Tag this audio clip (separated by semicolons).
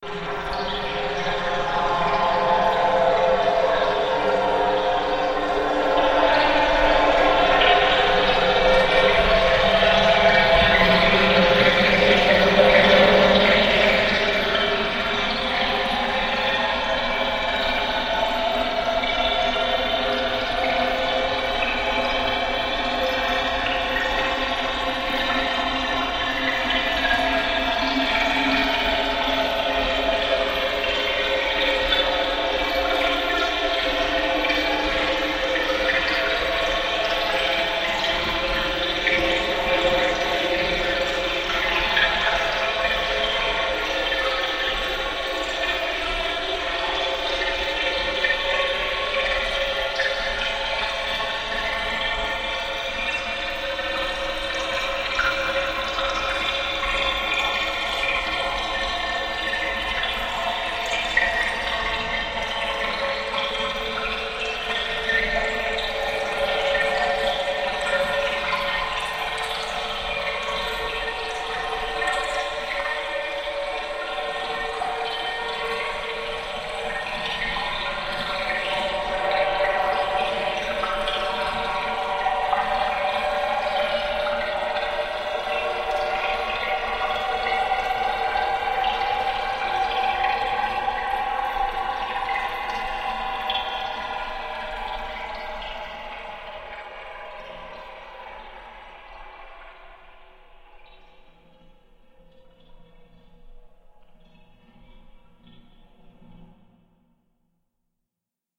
drain; submerged; water